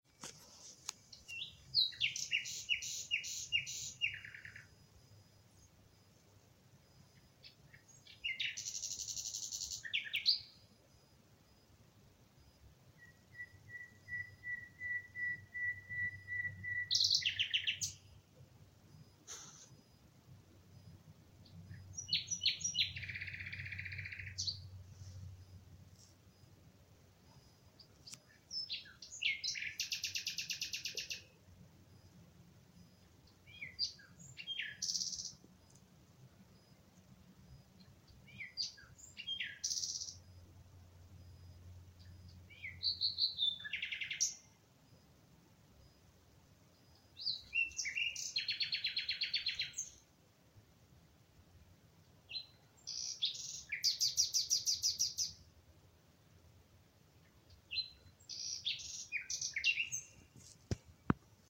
Nachtigall Nightingale
I recorded a nightingale in night from 26th to 27th of April 2020. It sat in a tree, just around the corner of my house.
ambiance, ambience, ambient, bird, birdsong, field-recording, forest, nachtigall, nature, night, nightingale, spring